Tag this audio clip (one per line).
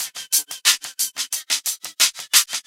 loop
sampler
bpm
drum
ensoniq
stick
90